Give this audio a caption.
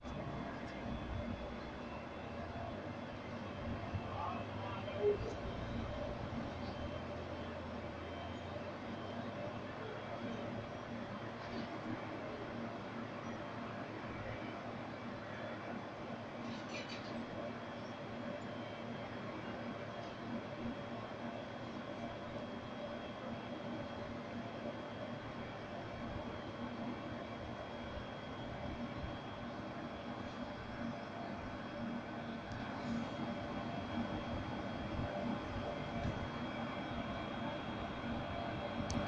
11 Air Conditioner Ambiance
library, open